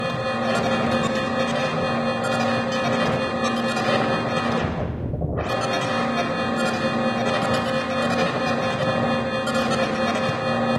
ctk811 circuitbend (8)
sci-fi alarm 3
Edited and normalised in Sound Forge 13
circuit-bending, digital, electronic, noise, scifi-sfx